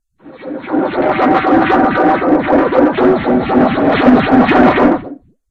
CharlotteRousseau 2013 2014 industrialheart
This is a recording of metal friction.The sound was modified by the effect "wahwah". I changed the speed.Then the sound was normalized.Sound is like a heart beating very fast or compression movement of a machine.
heart, compression, industrial